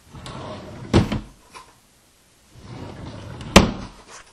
Wood Drawer
The sound of your
average, everyday wooden drawer
being pushed and pulled.